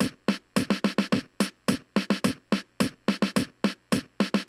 a close mic'd speaker of a child's toy keyboard playing a marching drum pattern at 107 bpm